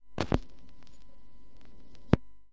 Vinyl Record, On Off, A
Raw audio of placing the needle of a record player onto vinyl, then taking it off a second later.
An example of how you might credit is by putting this in the description/credits:
The sound was recorded using the "EZ Vinyl/Tape Converter" software on 24th March 2018.